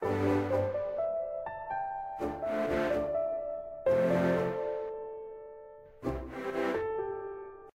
Can be use for gaming background videos or intro. I used Magix Music maker to make the beat.